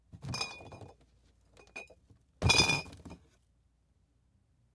Box Of Bottles Movement FF238
High pitched tinging, glass on glass, bouncy movement of a box of bottles in motion and being set down.
Box-of-bottles, bouncing-glass, tinging